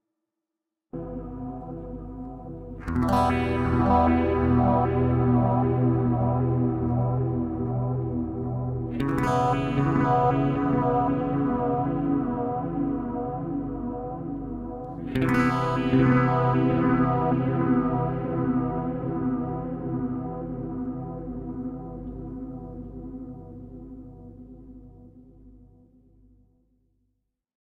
Acoustic Ambient Guitar - 78Bpm - 1
Deep and evolving acoustic guitar sample.
Gear used:
Maquina del tiempo Mdt6 Delay - Dedalo (Argentinian pedals)
Modulo Lunar Phaser - Dedalo
Hummingverb Reverb - MBS efectos (Argentinian Pedals)
Mr Smith Delay - MBS efectos
Ibanez electroacustic PF17ECE
Apogee Duet 2
Ableton Live
Frontier Self adaptive Limiter - D16 Group Audio Software
Like it ?
w3ird0-d4pth
dreamy, phaser, experimental, drone, delay, acoustic, reverb, acousticguitar, evolving